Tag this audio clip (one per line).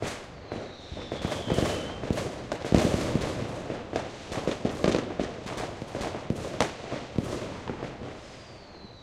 thunder
shot
fireworks
s4
explosion
c4
ambient
delphis
fire